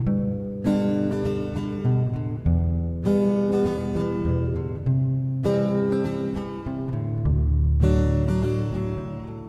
Common 50’s chord progression Yamaha acoustic guitar loop with reverb.